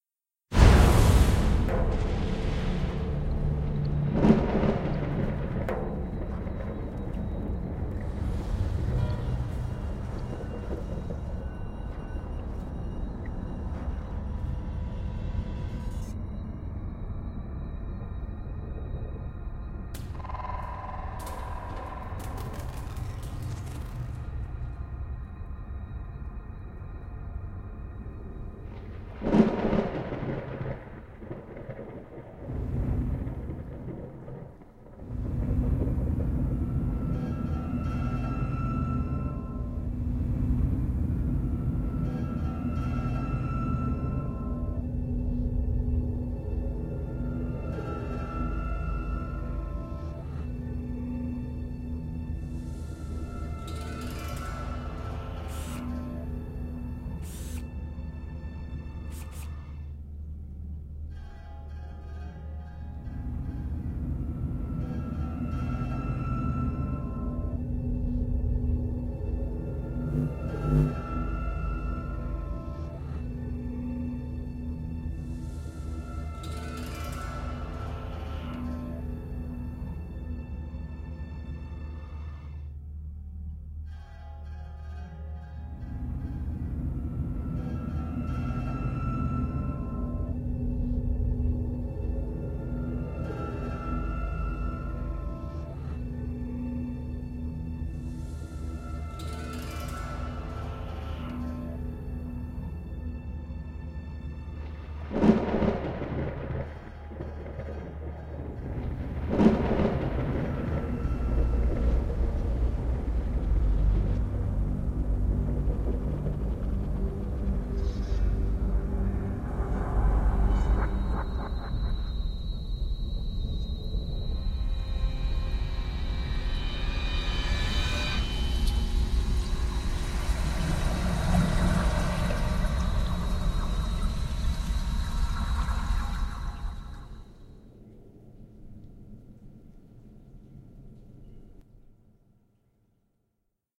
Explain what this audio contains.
4 channels horror sound created by Audacity
horror, channels